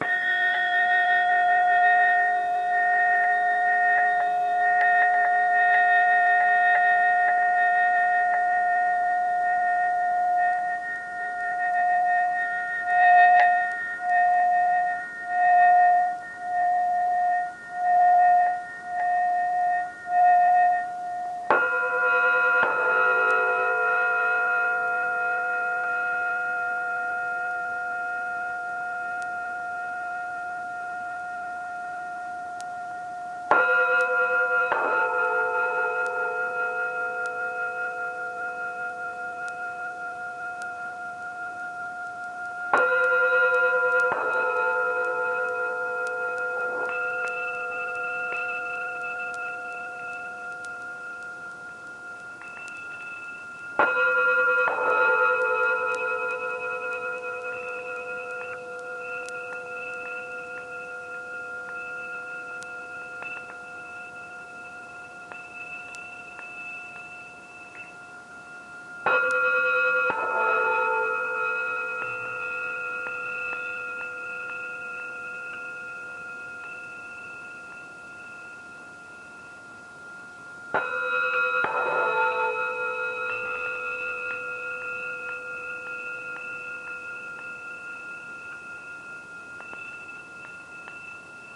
used a micro-cassette recorder to record bells from the Naxi village in Yunan, China. I'm swinging them around, which gives the recording a flange-like warble sound, exaggerated by the tape recorder.